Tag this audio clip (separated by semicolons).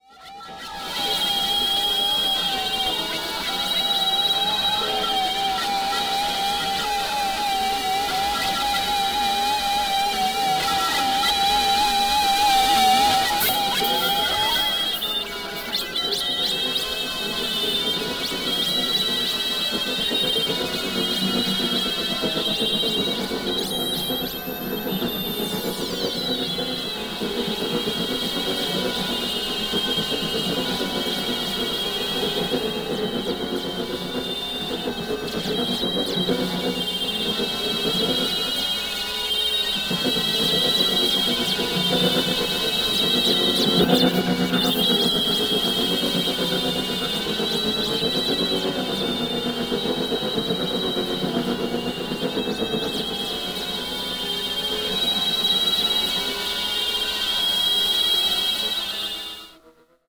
electrical; electronic; electronica; experimental; noise